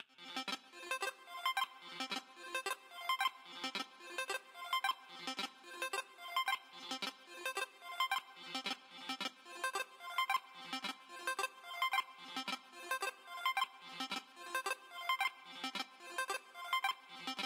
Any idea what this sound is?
Blips 110bpm
Arpeggiated blips witch have a retro videogame feel.
110bpm, 8bit, arcade, arp, arpeggio, blips, comb, delay, game, gameboy, loop, reverb, synthesizer, videogame